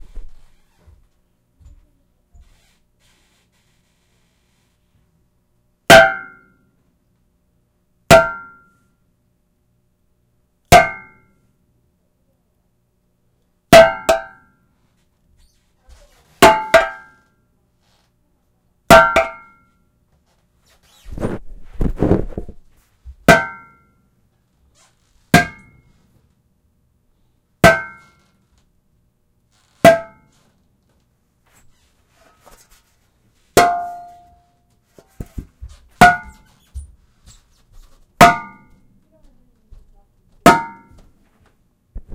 Raw audio I used for a Ren-Faire anvil sound. (In the final version, I dropped the pitch.)